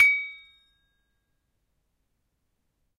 Sample pack of an Indonesian toy gamelan metallophone recorded with Zoom H1.
metallophone, metallic, hit, percussive, metal, percussion, gamelan